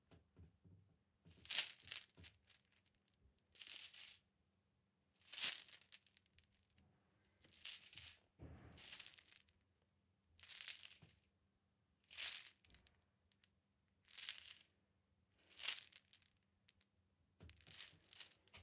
Handling a metal chain